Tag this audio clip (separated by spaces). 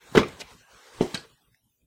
Footstep ladder metal Run Step step-ladder Walk walkway